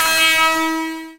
It's a bit sharp to the ear, but it gets your attention well. I figured it could be part of a completely engineered sound effect, or stand on its own as a laser, a hit glance/blocked glance, or something electric.